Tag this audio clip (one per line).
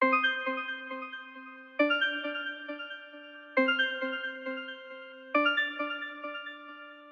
135-BPM
Dance
EDM
Electric
Harp
Loop
Synth